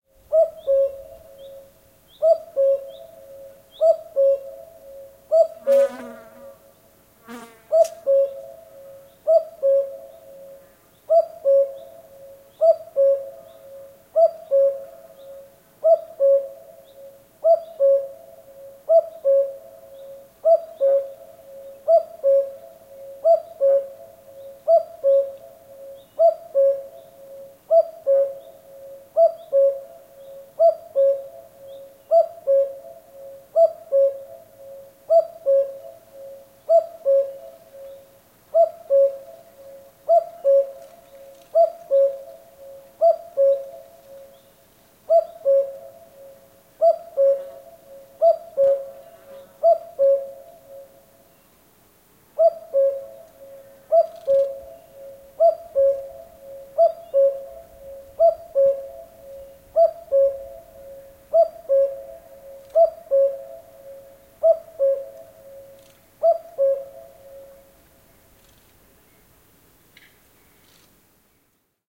Käki kukkuu innokkaasti yhtäjäksoisesti lähellä, kaiku vastaa, kesä. Joku hyönteinen, muutoin hiljainen tausta.
Paikka/Place: Suomi / Finland / Kerimäki
Aika/Date: 08.07.1997